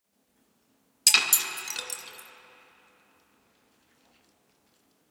Dropped, crushed egg shells. Processed with a little reverb and delay. Very low levels!
crackle, crunch, crush, drop, eggshell, ice, splinter